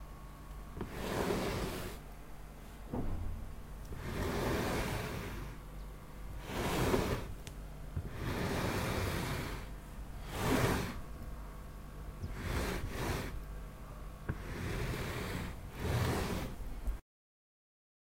A large armchair sliding across a wooden floor
arm-chair, sliding, wooden-floor